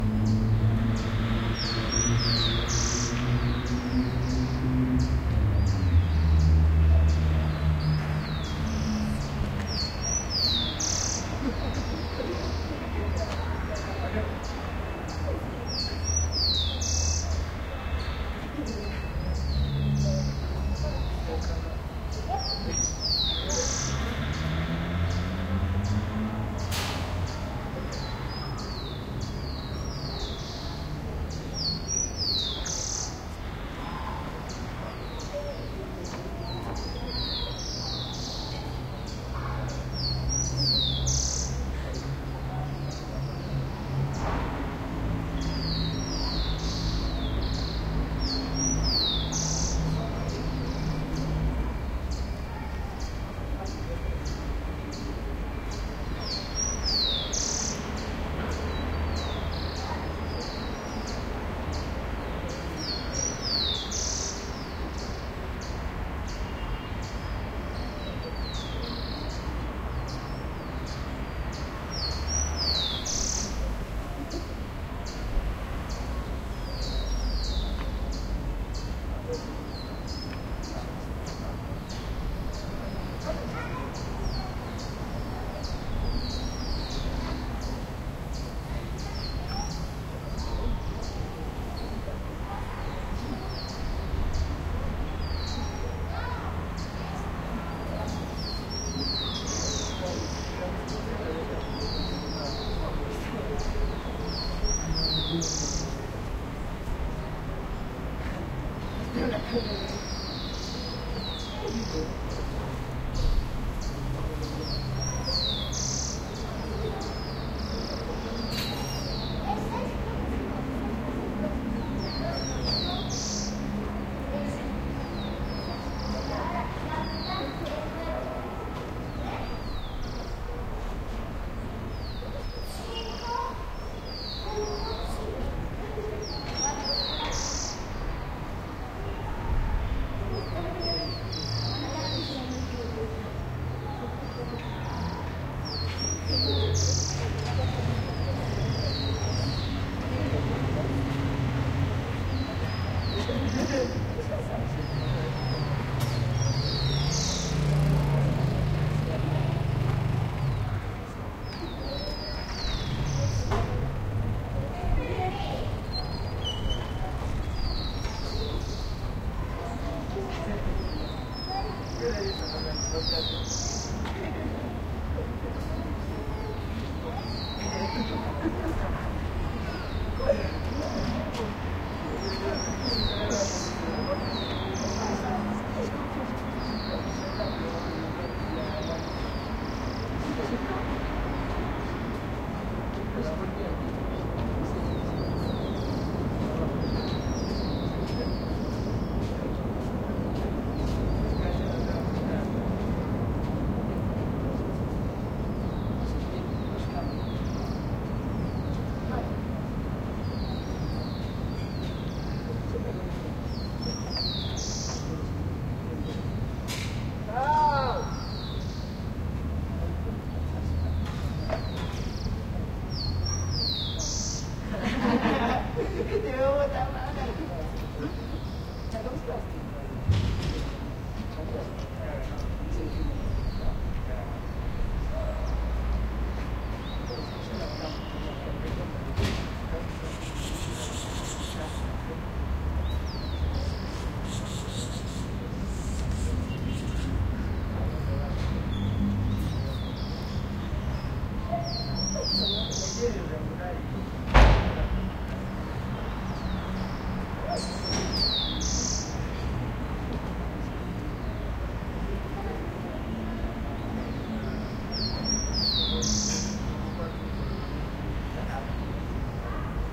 residential area hill with wall overlooking apartment block distant traffic bird and guys conversation right Cusco, Peru, South America